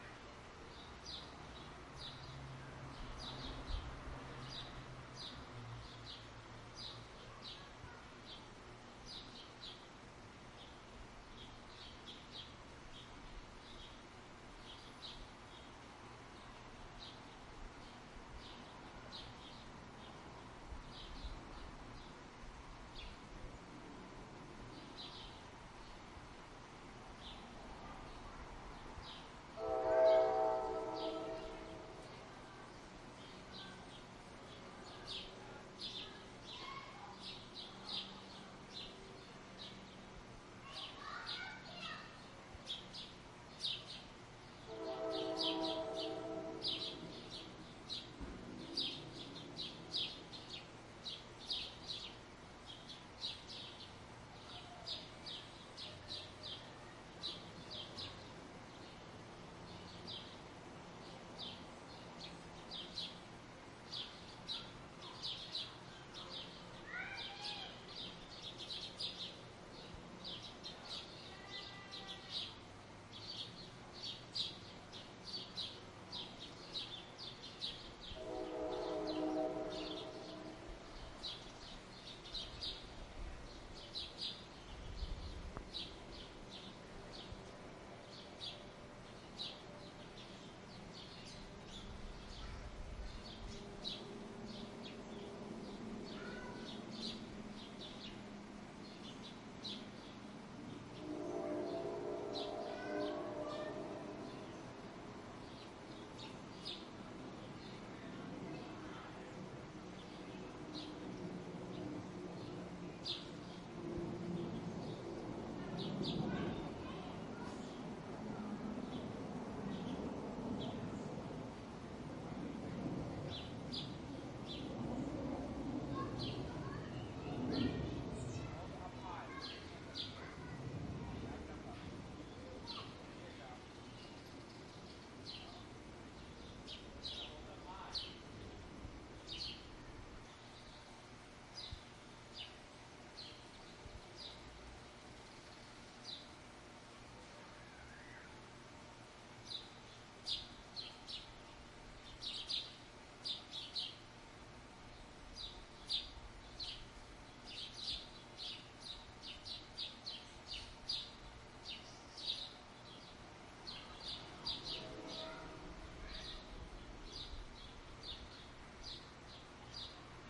New Jersey Backyard in Springtime Ambience (loop)
I recorded this in my back yard. Birds can be heard, as well as children playing. The sound of a distant train horn can be heard. An airplane passes overhead. The neighbor's swimming pool filter is faintly audible.
Recorded with a Zoom H1
field-recording, ambience, children, pool, suburbs, airplane, children-playing, birds, afternoon, pool-filter, train, train-horn